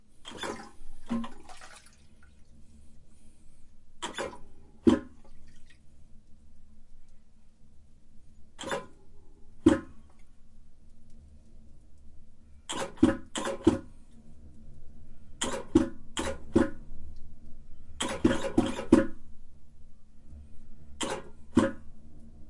toilet won't flush / no water in tank
bathroom, flush, toilet, water, washroom, restroom, flushing